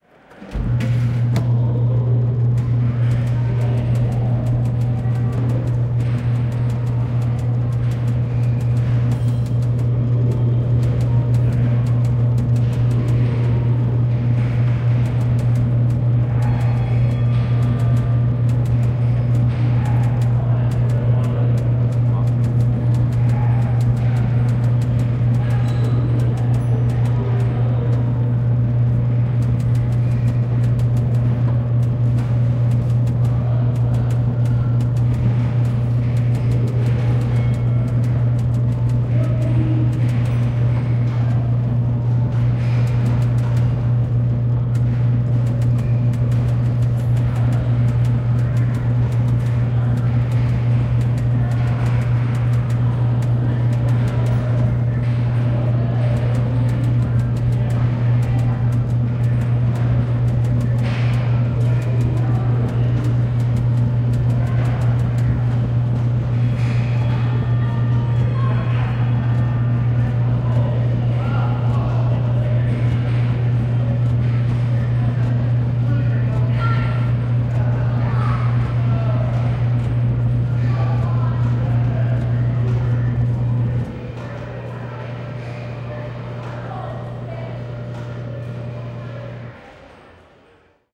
MM Project - 11 Zodi Fortune Telling Machine
Musée Mécanique recording project - 11 Zodi Fortune Telling Machine
1. set dial according to your birth sign
2. place hand on cabinet to establish mystic contact
3. insert coin into slot, watch Zodi type your personal horoscope
4. horoscope delivered at bottom right side of cabinet
coin-operated, Fishermans, spirit, telling, carnival, arcade, Musee-Mecanique, horoscope, mystic, old, psychic, mystical, field-recording, teller, royal, astrology, mechanical, machine, amusement, museum, vintage, typewriter, divination, predict, Zodi, type, future, Wharf, San-Francisco, fortune